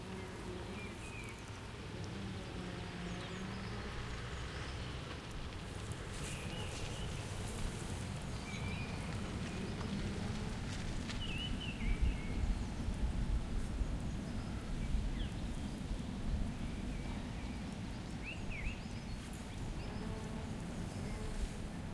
Ambience - Leaves in wind, birds, power tools
wind, rustling, noise, trees, power-tools, birds, ambient, field-recording, ambience, birdsong, rustle, Leaves